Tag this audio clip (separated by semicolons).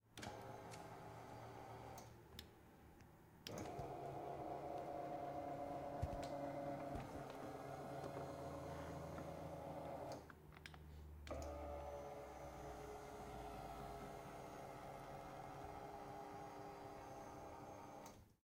electric; machine; whirr; couch; recliner